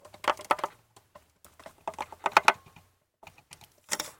Faucet or pipes strain
The sound of a pipe being strained as if about to burst open.
faucet, pipe, wrench